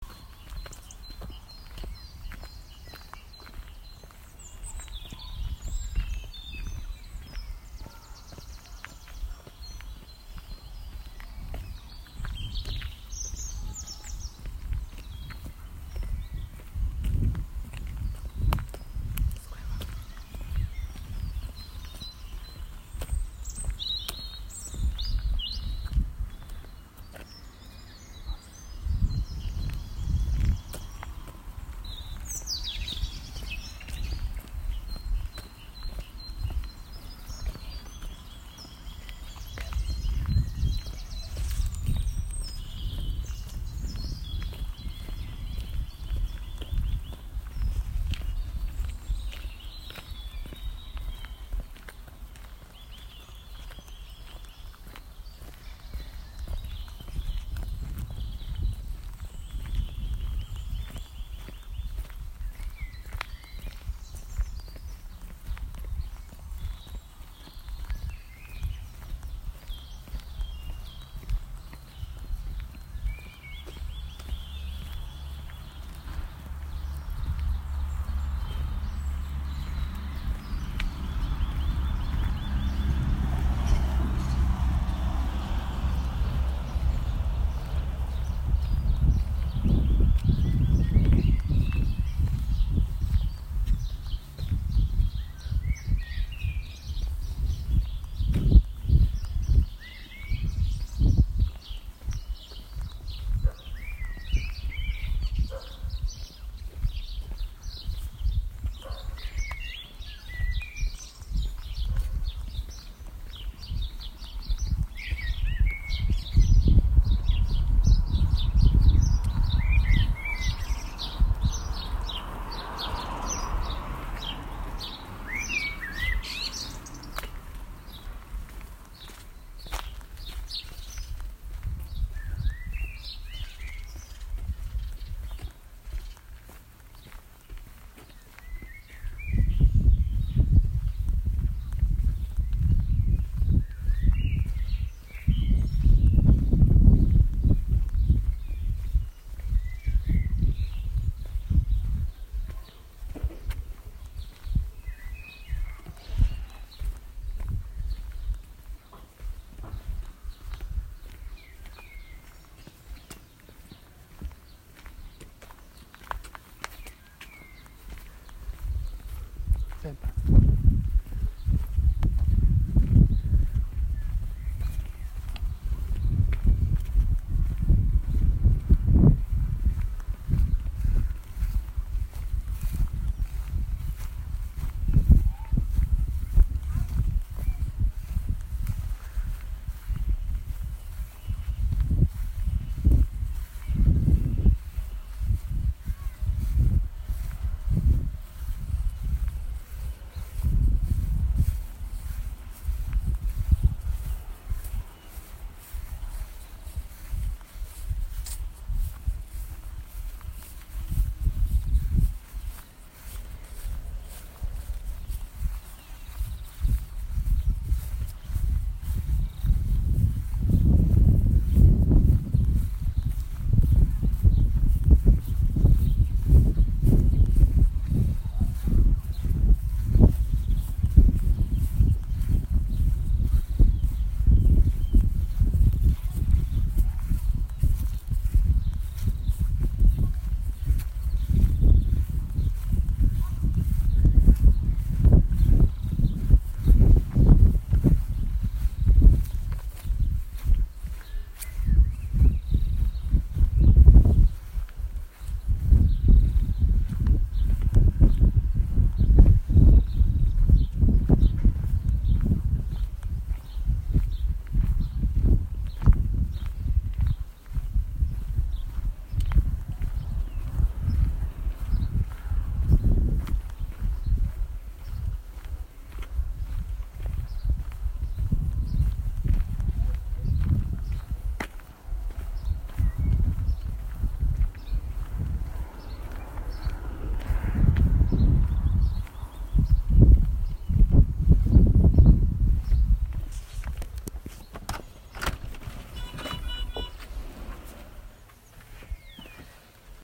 Nature spring walk through a peaceful British park and the suburbs with birdsong
A raw recording from my iPhone 6s of me and my sister walking through a peaceful British park with plenty of birdsong, a little bit of wind noise, and just one car passing by.
We're first walking on a gravelly path in the park, to a pavement, and then on some grass as we make our way home.
birds, birdsong, British, field-recording, nature, park, spring, suburbs, Walk